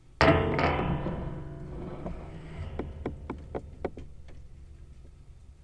door spring06
Contact mic on a door with a spring
metal, spring, metallic, boing, piezo, twang, birds